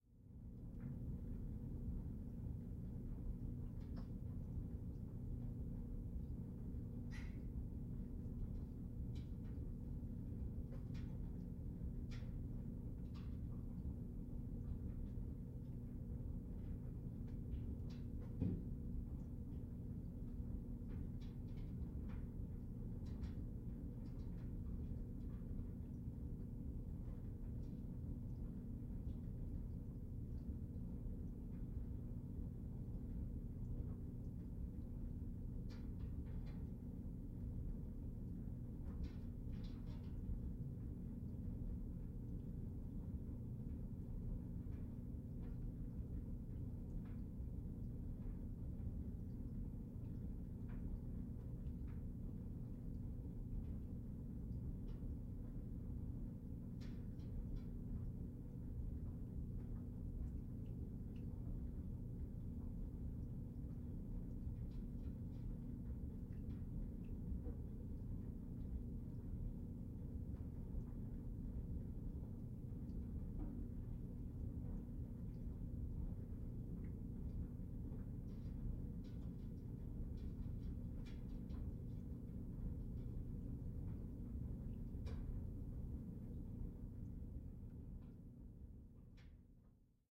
Household Ambience Laundry Room Mic Under Floor 02
Household Ambience Laundry Room Mic Under Floor
Ambience, Household, Laundry, Mic, Room, Under